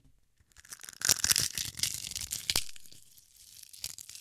Cracking Eggshell, stereo only left channel, sounds like breaking bones.
horror, field-recording, Cracking-Eggshell, horror-fx, effects